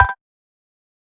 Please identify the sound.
GASP UI Alert 2
Sound FX for an alert notification.
Alert, FX, UI